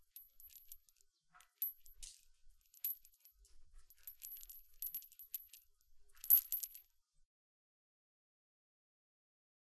Chain Rattling - 1
SFX - Chains being rattled outside, variation in the intensity of the rattling. Recorded outside using a Zoom H6 Recorder,